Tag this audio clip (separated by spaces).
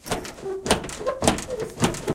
SonicSnaps School Germany Essen